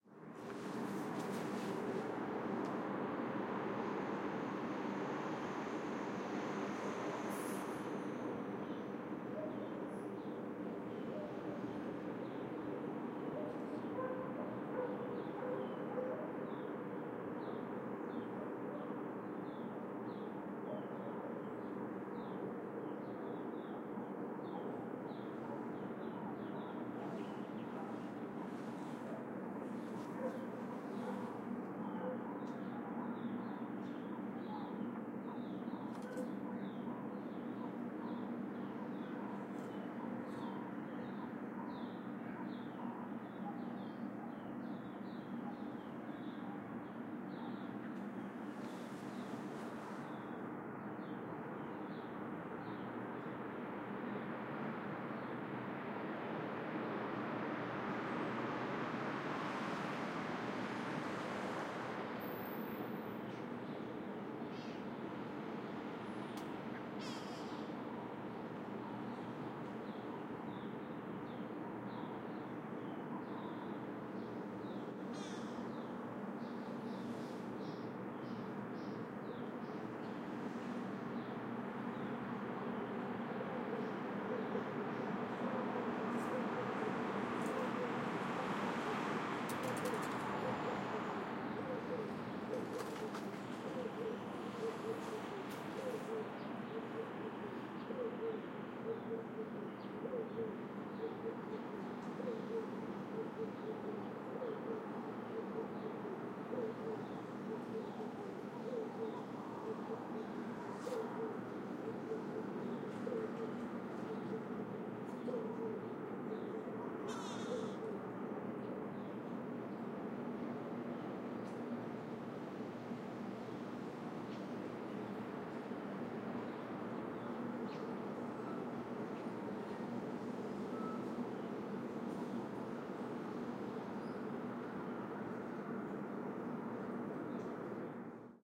Surround recording of the ambience in my garden on a late winter day. There is some wind and some garden bird sounds. Cars driving past (behind the mic setup so clearer on the Ls Rs pair) and some noise from the industrial aircon from an office park down the road and also a distant dog.
Recorded using double MS (MMS) using two Sennheiser MKH40 mics and a MKH30 as shared Side into two Zoom H4n recorders. Decoded as standard 5.1 (L R C LFE Ls Rs), but you can also use either stereo pair as a stereo ambience.